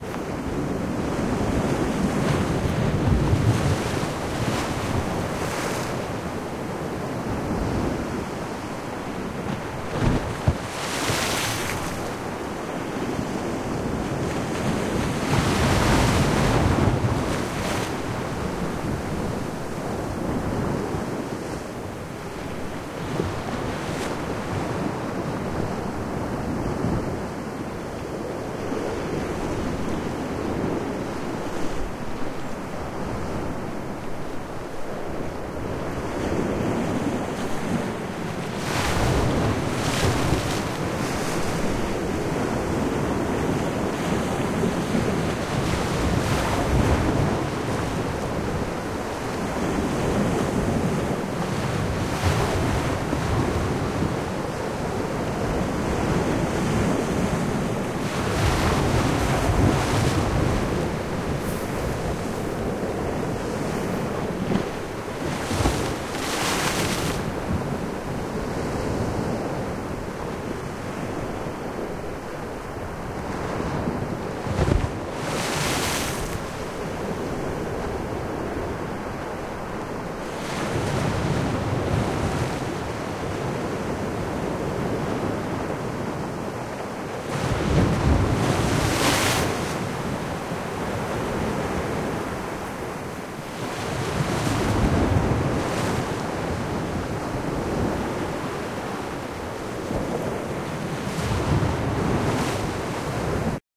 Waves Crashing Against Wall/Break Water 2
Another recording of slightly faster/more frequent waves lapping and crashing against a break water/wall with some quite a lot of spraying sound.
Again, there is this stereo effect of the waves hitting from left to right.
This would be a really good sound for any on boat/stormy sea needs.